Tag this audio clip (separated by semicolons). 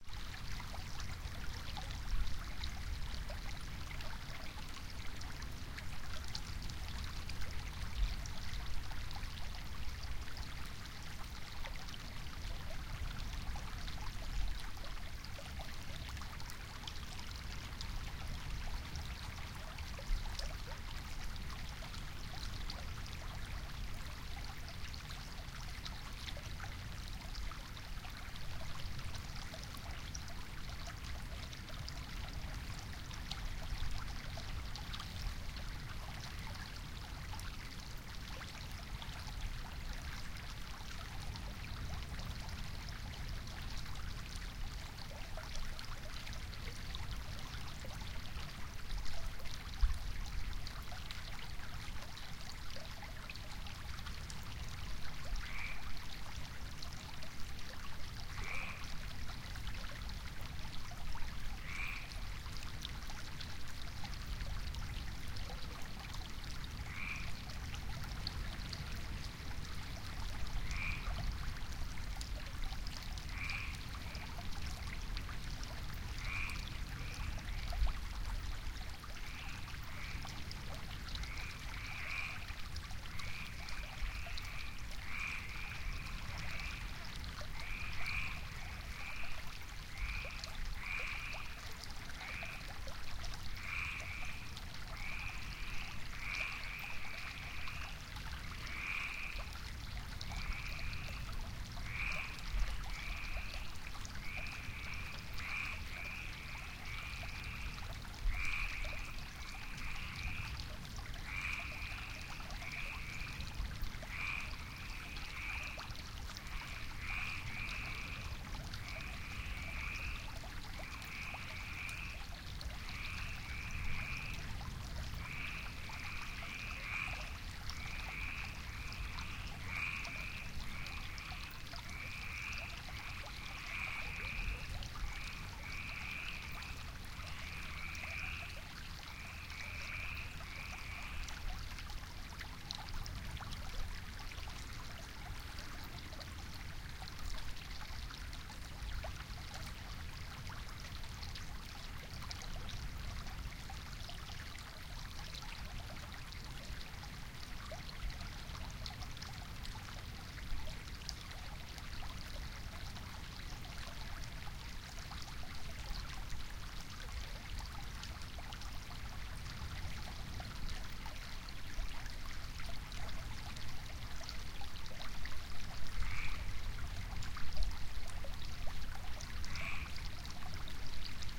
field-recording; frogs; quaak; river; sea; stream; water; waves